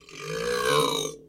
another burp same man
mic-audio, sound